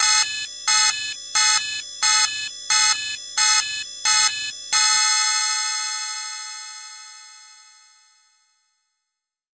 PPG 005 Detroit 100 bpm Chord Arp G#4
This sample is part of the "PPG MULTISAMPLE 005 Detroit 100 bpm Chord Arp" sample pack. It is an arpeggiated dissonant chord at 100 bpm.
In the sample pack there are 16 samples evenly spread across 5 octaves
(C1 till C6). The note in the sample name (C, E or G#) does not
indicate the pitch of the sound but the key on my keyboard. The sound
was created on the PPG VSTi. After that normalising and fades where applied within Cubase SX.
100-bpm, multisample, ppg, arpeggiated